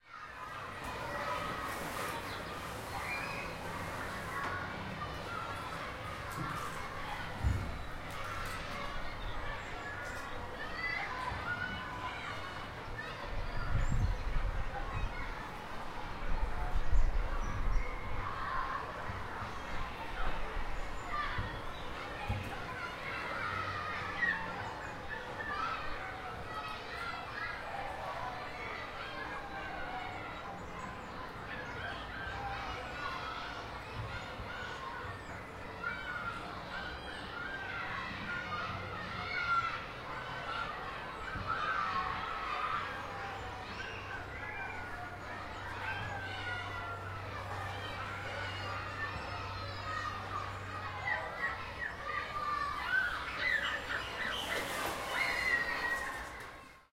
Ambience, Children Playing, Distant, A
Raw audio of children playing at a distant playground with some bird ambience and other noises.
An example of how you might credit is by putting this in the description/credits:
The sound was recorded using a "H1 Zoom recorder" on 22nd November 2016.
ambiance, ambience, distant, kid, kids, play, playground, playing